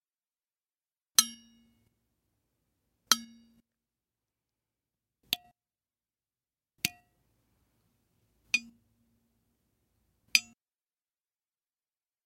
Ringing Bells (2)
Another collection of ringing bells.